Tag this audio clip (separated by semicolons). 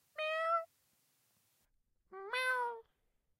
kitty; cat; pussy; 3naudio17; animal